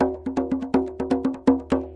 tambour djembe in french, recording for training rhythmic sample base music.
djembe, drum, loop